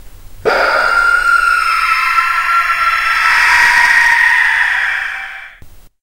Monsters Scream
Scare sound from far monsters.